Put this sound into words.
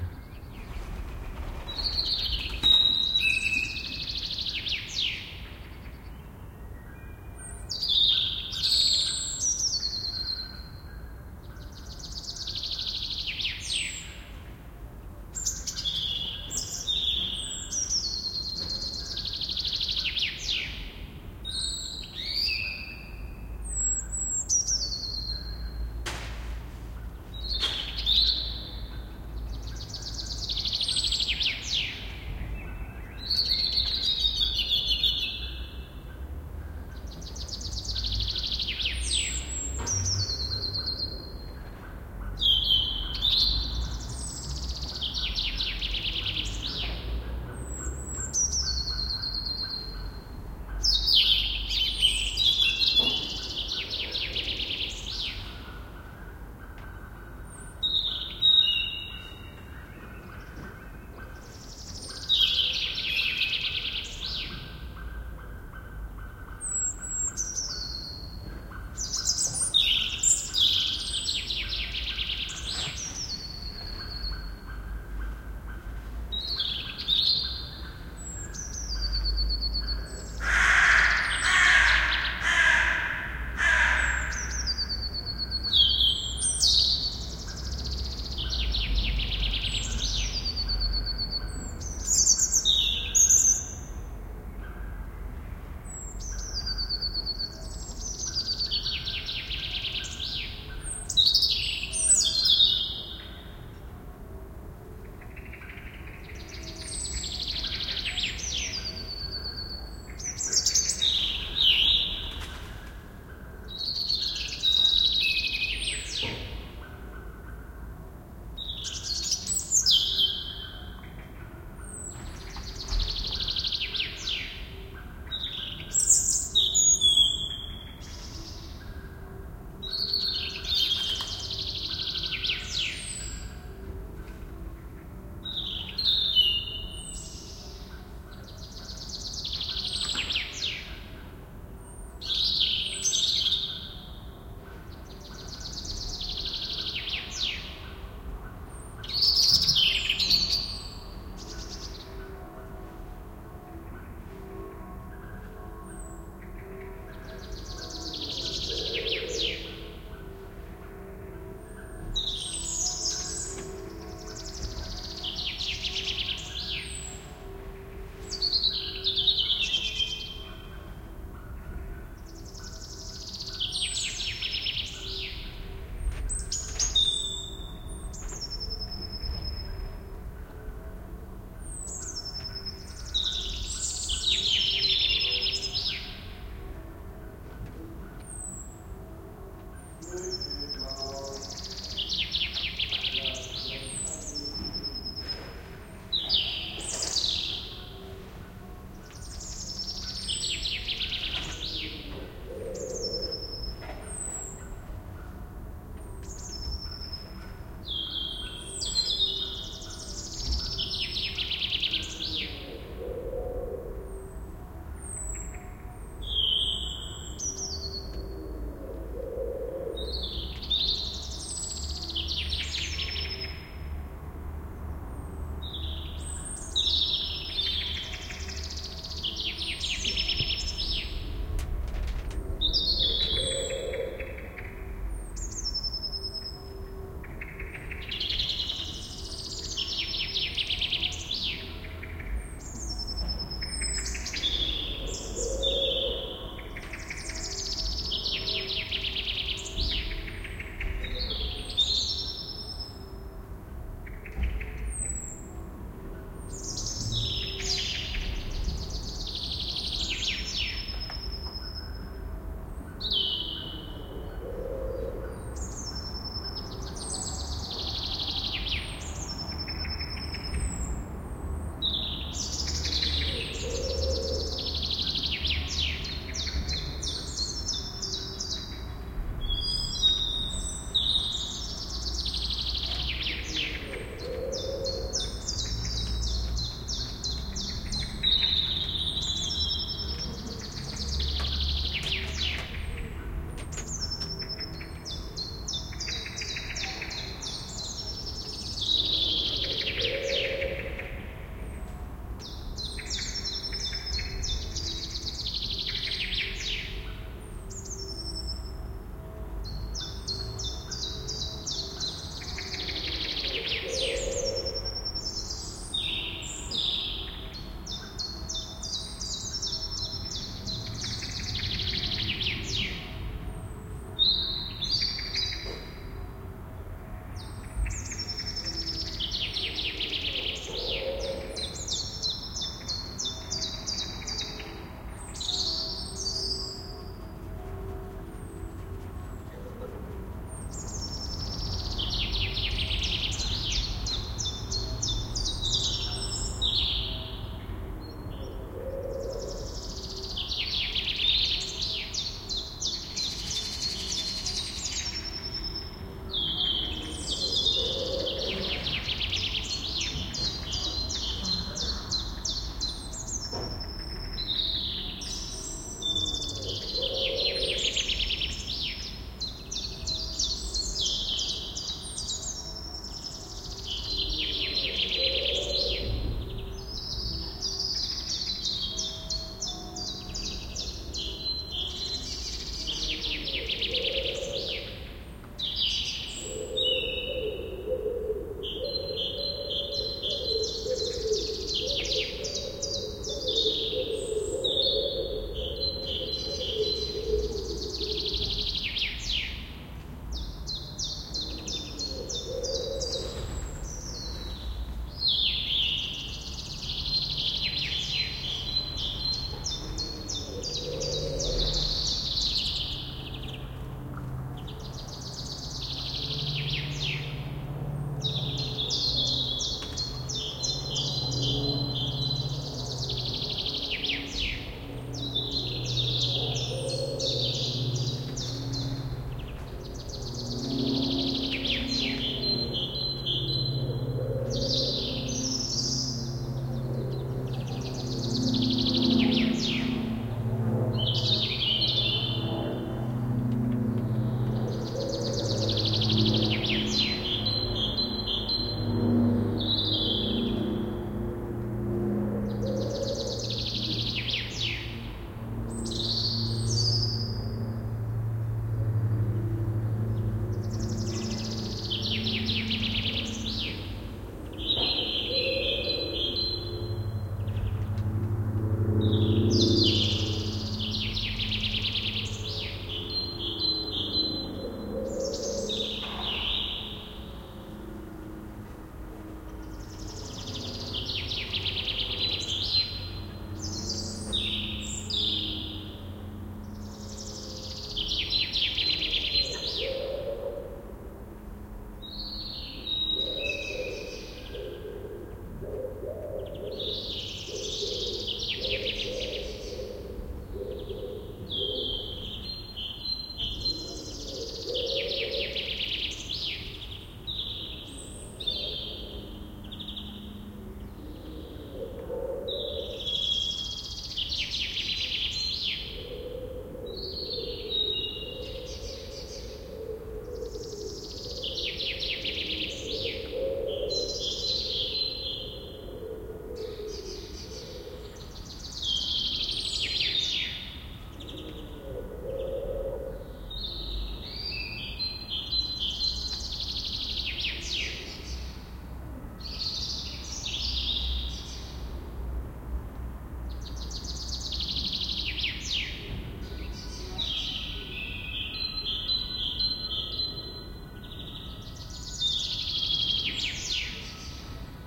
A robin singing from a poplar tree in town. MKH60 microphones into Oade FR2-le.
Field-recording, backyard, spring, robin